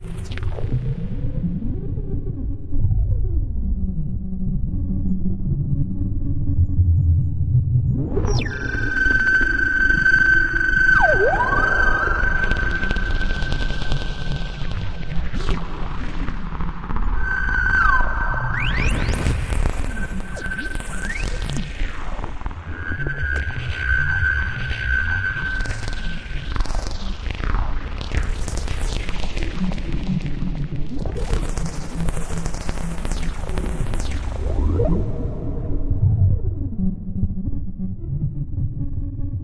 a collection of sinister, granular synthesized sounds, designed to be used in a cinematic way.